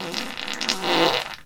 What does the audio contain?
High quality studio Fart sample. From the Ultimate Fart Series. Check out the comination samples.
Fart, Geschwindigkeit, blowing, brzina, ferzan, flatulence, gas, hastighed, intestinal, passing, raspberry, razz, snelheid, velocidad, vitesse, wind